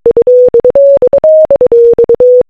Flint Red Phone Re-Creation
A simple recreation of the "Flint Phone" sound effect using sine waves.
This effect originally appeared in the film, "In Like Flint" as the Presidential "red phone" sound effect. It later appeared in the film, "Austin Powers: The Spy Who Shagged Me," as Austin Powers' car phone ringtone.
powers, flint